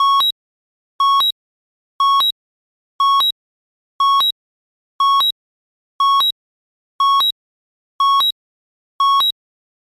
archi scifi alarm tracking 03
Science fiction alarm for radar or tracking an object. Synthesized with KarmaFX.
synthesizer, tracking, scifi, fictional, indicator, alarm, danger, indication, synthesized, tracked, warning, science-fiction